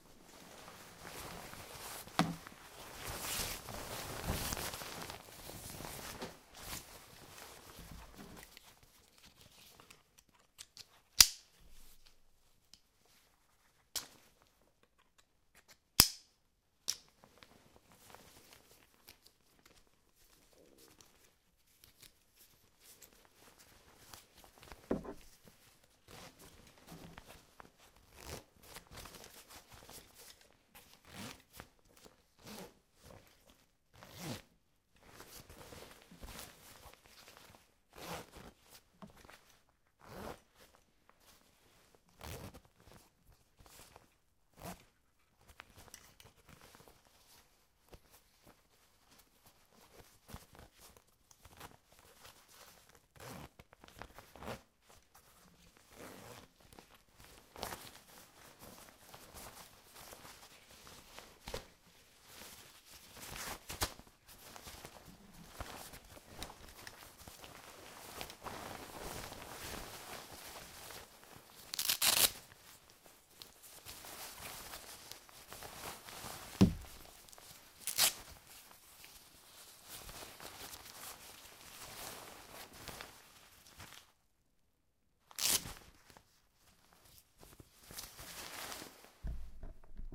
Winter Sports Pants Foley
Touching and opening and closing zippers and buttons and buckles of pants for snowboarding. Recorded with a Zoom H2
rustle, click, clothes, wear, zipper, clothing, gear, touch, foley, texture, snow, sports, outfit